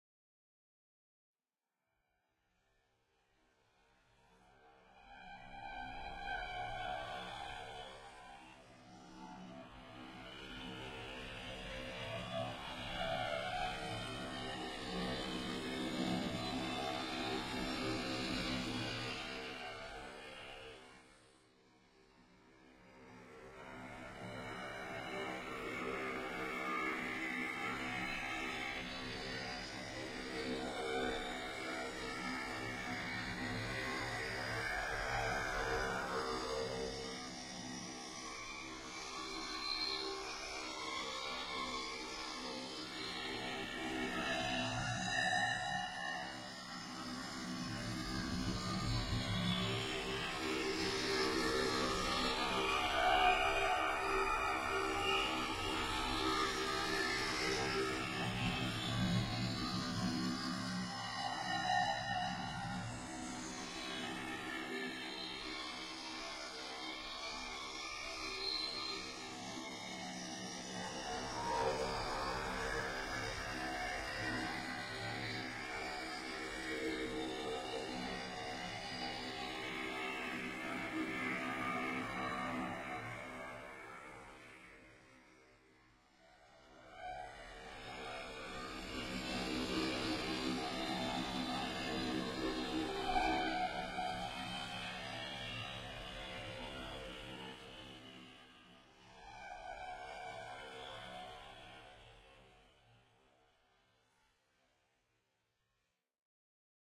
09 chant bunker Bonus (08 grain delay, frequency shifter)
strange sound design, creepy drones. Eighth step of processing of the bunker singing sample in Ableton. Added Ableton's Grain delay and Frequency shifter to the last sample, to distort it some more !!!
abstract, effect, freaky, fx, sci-fi, sfx, sound-design, sounddesign, soundeffect, strange